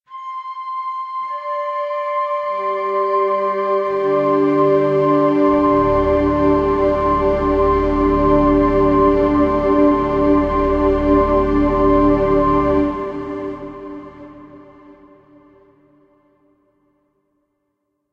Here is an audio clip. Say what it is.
Enjoy the use of our sound effects in your own projects! Be creative and make a great project!
ambience, ambient, atmosphere, background, chord, cinematic, dark, drama, dramatic, film, instrument, instrumental, interlude, jingle, loop, mood, movie, music, outro, pad, radio, scary, soundscape, spooky, suspense, thrill, trailer